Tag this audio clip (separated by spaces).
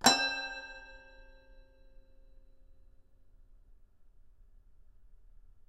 instrument instruments sample studio toy toypiano toys